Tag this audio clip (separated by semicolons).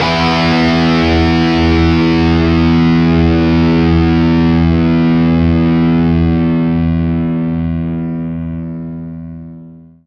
Distortion; Electric-Guitar; Melodic